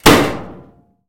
Appliance-Clothes Dryer-Door-Slammed Shut-01
The sound of a clothes dryer door being slammed shut.